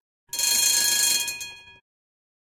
11-3 Tram bell
The sound of the tram bell
CZ, czech, panska, tram